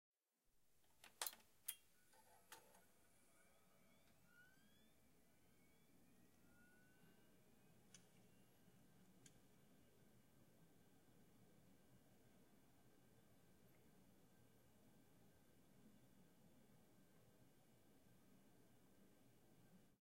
Turning On PC Computer
Recorded with a Zoom H4N in a small bedroom in stereo.
stereo, boot, computer, up, close, pc